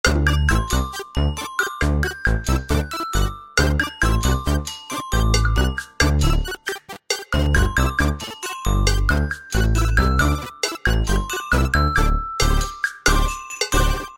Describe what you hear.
Stacatto rhythm
8 bar loop,D major, synth bass percussion and glockenspiel, game loop
electronic, movement, busy, loop, game